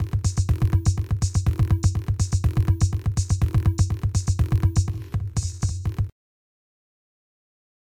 "Fast Bass Pulse" with some time stretching.